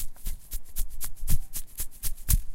shaking a salt bag
city-rings, field-recordings, sound